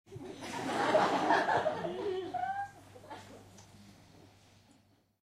LaughLaugh in medium theatreRecorded with MD and Sony mic, above the people
theatre, crowd, czech, audience, auditorium